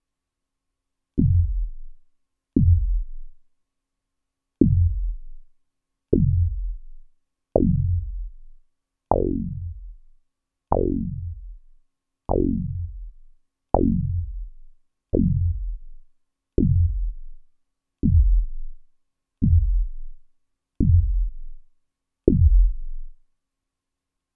synthKickish Kick-2.1
This sort of kickish type sound is one of my experiments with my Model D. The ones near the end sound the kickiest and there's a nice filter sweeping as it goes along. They'll probably be best shortened a bit but, hey, it's up to you.
kick, electronic, drum